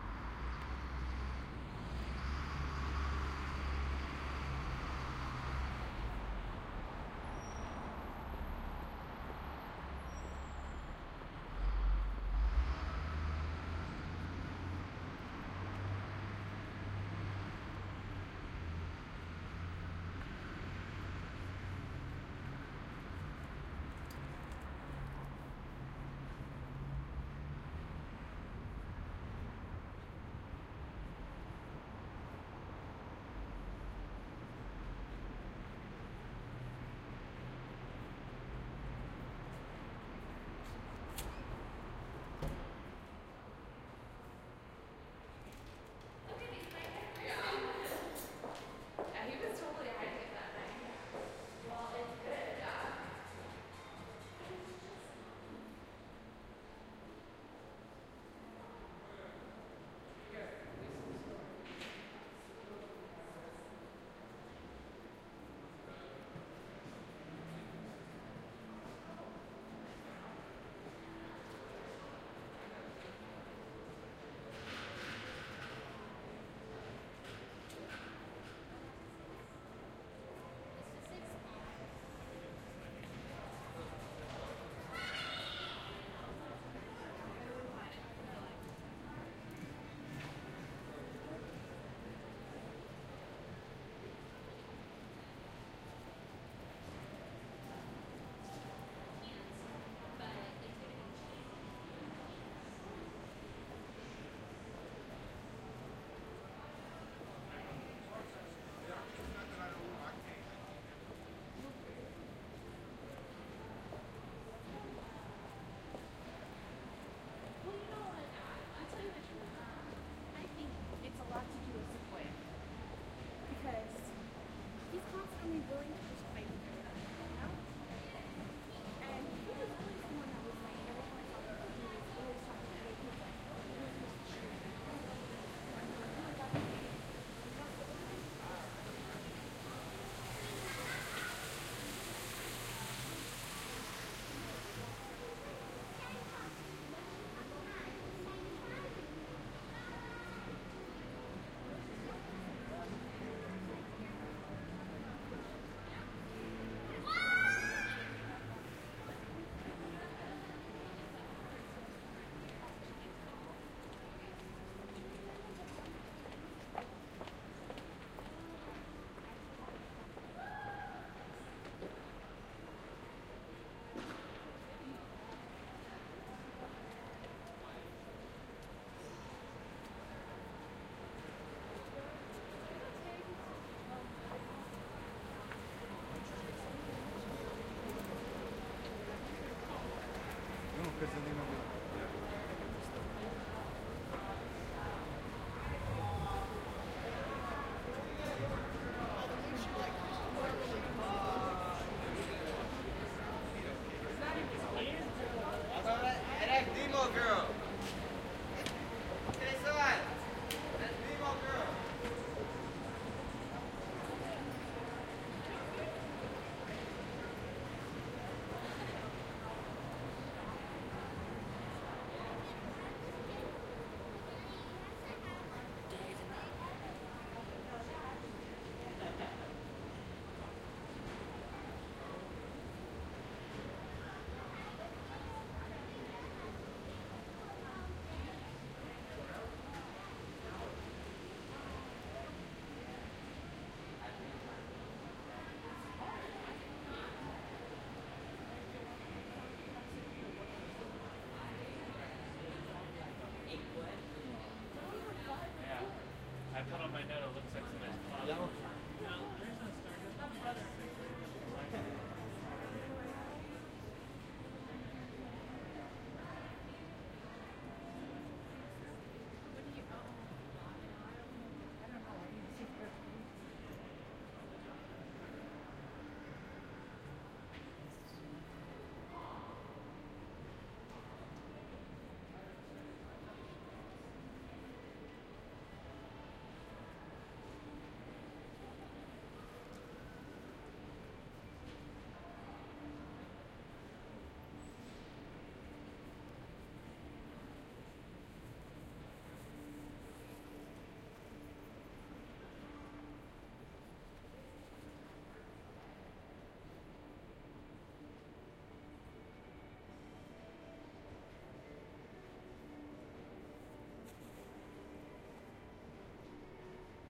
lloyd center again
Walking through the Lloyd Center Mall in Portland, Oregon. I walked from one end to the other, past the ice rink and up an escalator. Since the last time I recorded here, they have changed the decor and the ambiance is quite different. To compare, here is my last recording:
Recorded with The Sound Professional binaural mics into a Zoom H4.
ambient, binaural, field-recording, inside, mall, people, phonography, purist